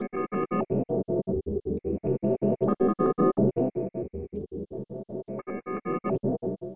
probe1chopedfilter
experimental, filter, noise, probe, software, synth